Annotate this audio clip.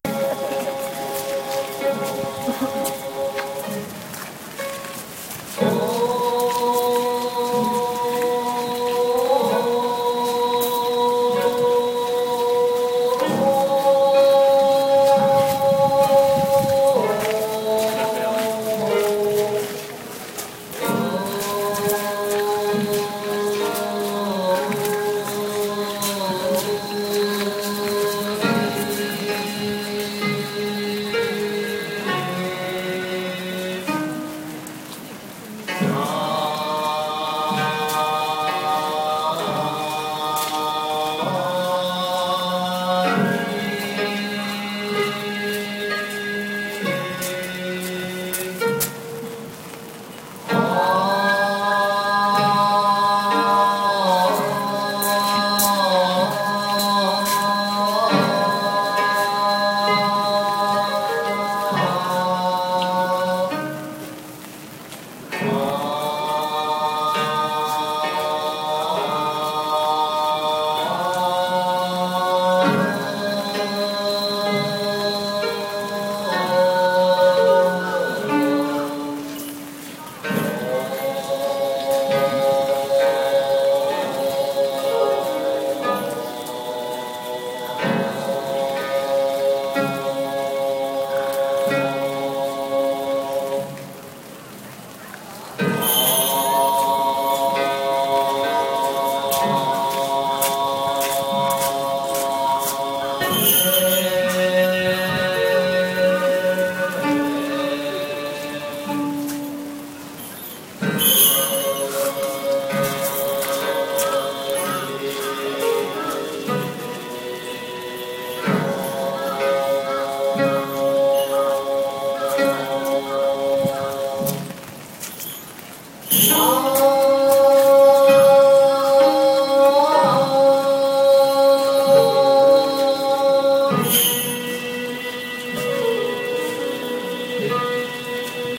Asakusa religious cerimony, Tokyo, Japan